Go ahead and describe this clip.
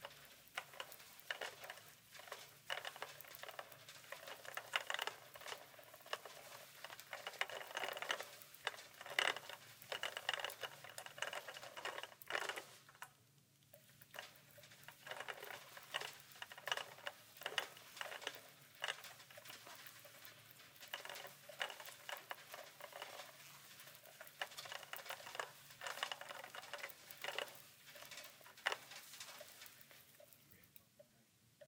Shopping cart - wheels, medium speed
Shopping cart wheels at medium speed
casters, cart, push, wheels, roll, Shopping, grocery